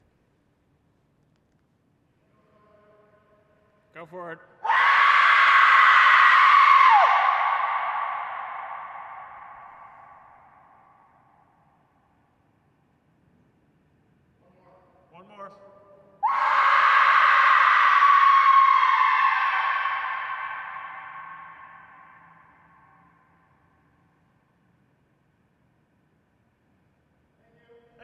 2 screams recorded in an empty hangar. Protools w/ a BeyerDynamic MC837PV shotgun. Nice reverb tail